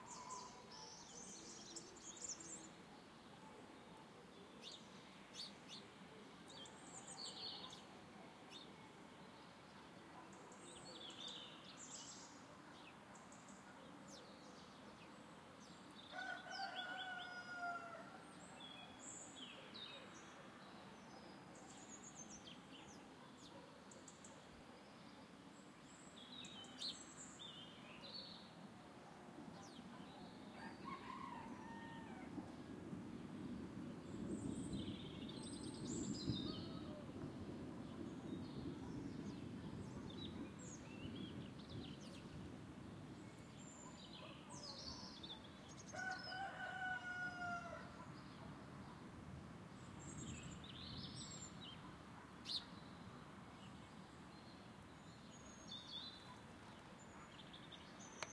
pajaros gallo trueno
storm in galicia
h4n X/Y
bird, cock, storm, thunder